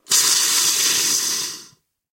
Druckablassen Sodaclub
ablassen, deflation, depressurization, Druck, Druckablassen, fizz, fizzle, hiss, Kohlensure, pressure, relief, sizzle, Ventil, whiz, zischen